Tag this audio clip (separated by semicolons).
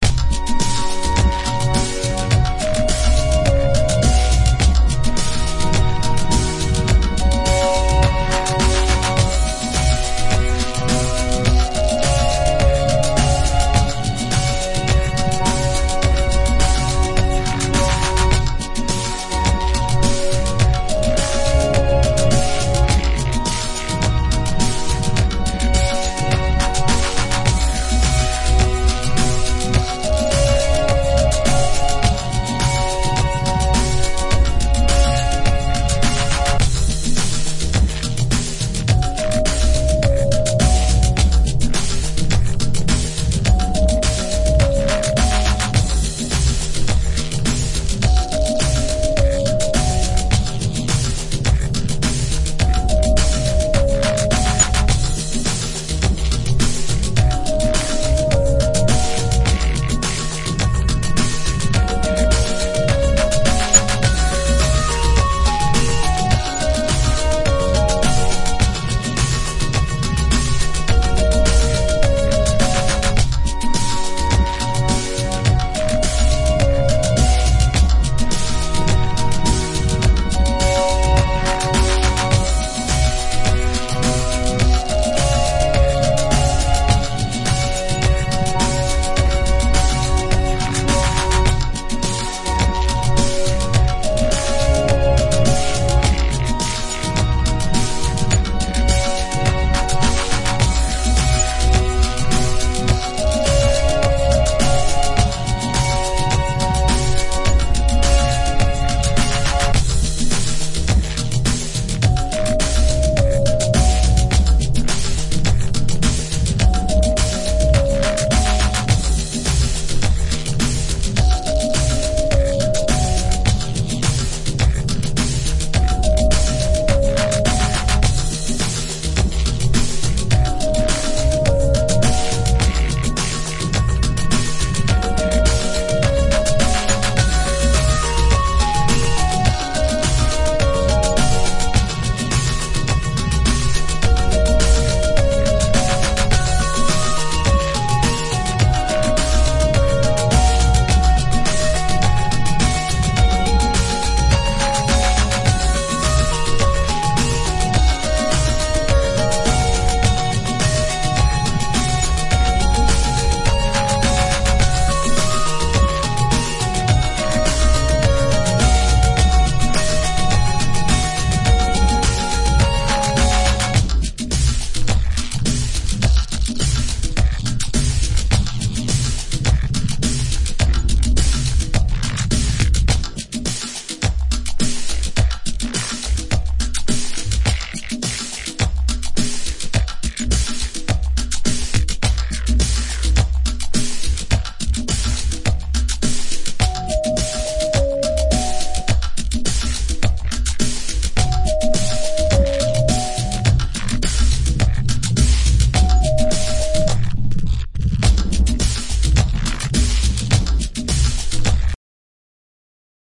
Buffalonugaluss; Dance; EDM; Eendee; FerryTerry; Loop; Samples; SSS-Synthwave; Vibe